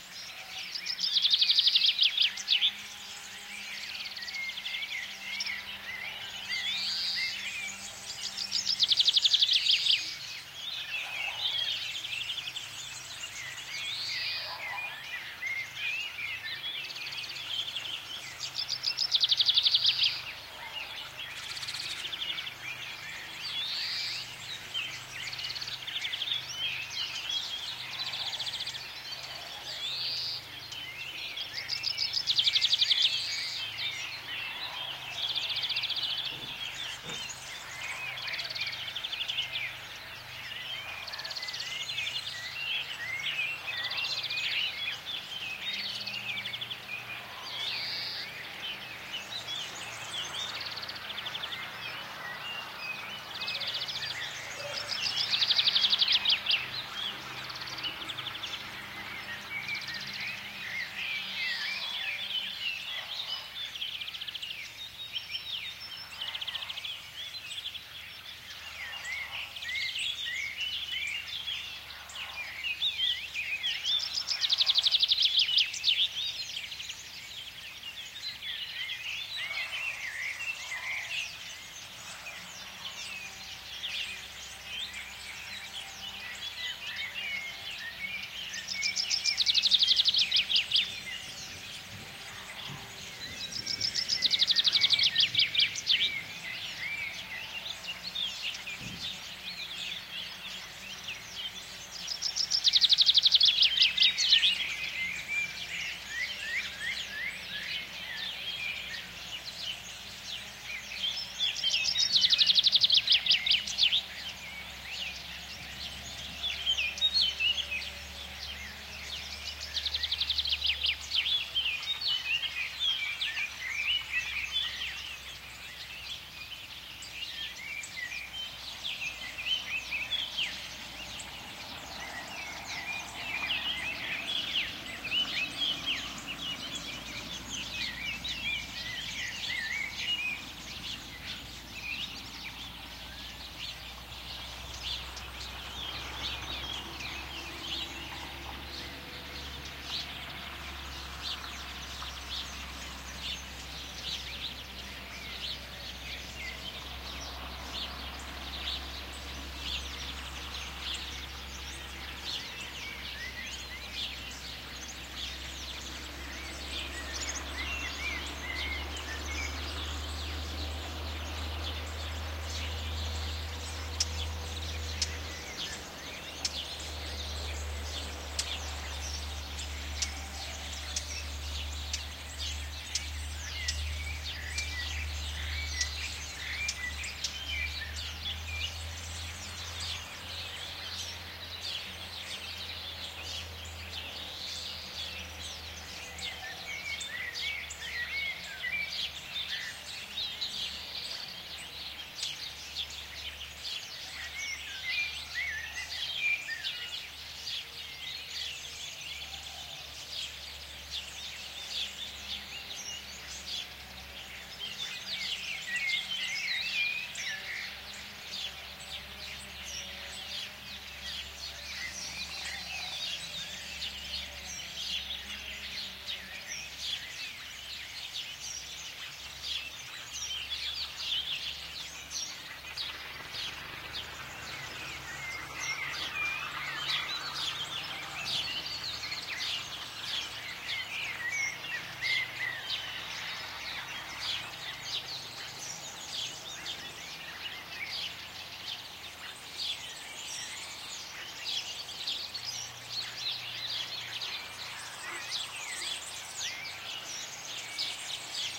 20080320.farm.ambiance.1
spring atmosphere at a farm near Carcabuey, Cordoba (S Spain). You hear lots of birds singing and motors (tractors) in background